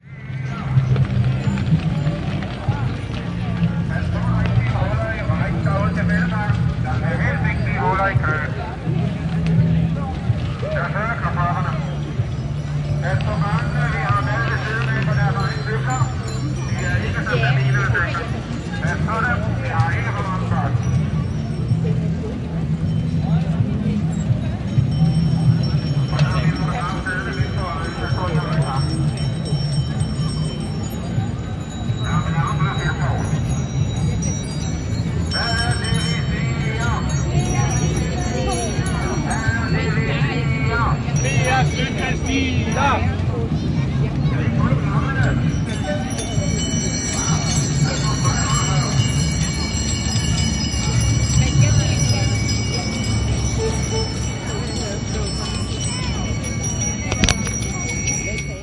05-BikeDemo Music Speak Chimes
Demonstration in Central Copenhagen in favour for bicycle paths, about 1981. Ambient sound recorded in mono on Nagra IS with a dynamic microphone and 7½ ips. Distant speaker with megaphone, a remote rock band and chimes on several passing bikes are heard.
music, bicycle-chimes, demonstration, ambience